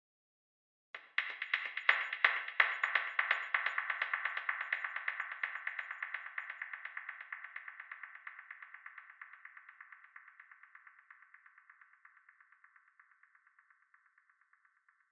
canica stereo
Perfect sound to include in any production as fade
analog, delay, tac